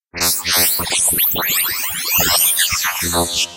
Fun with Bitmaps & Waves! Sweet little program that converts bitmap photos into sound! Added some reverb and stereo affects in Ableton.